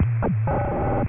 kHz II
Random captures from the Wide-band WebSDR project.
noise, radio, shortwave, shortwave-radio, static